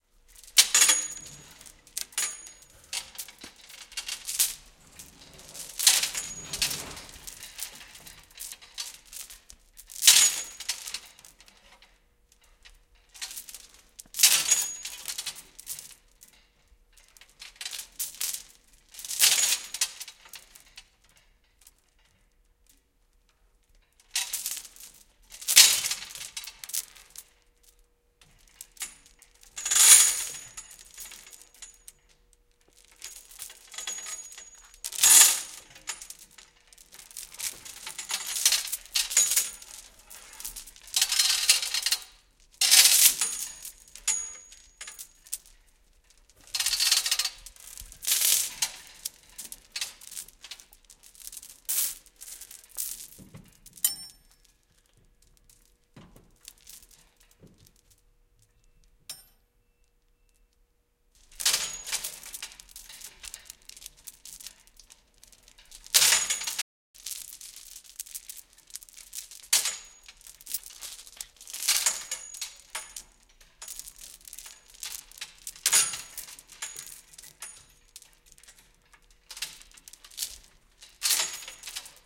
metal shop hoist chains thick rattle hit metal
chains, hoist, metal, rattle, shop, thick